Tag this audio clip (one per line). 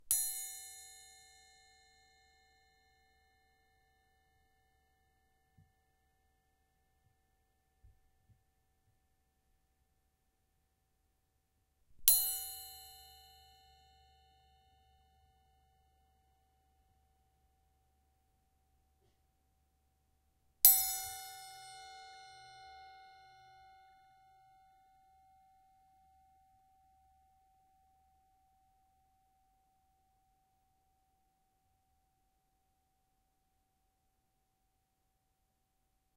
musical
triangle
punch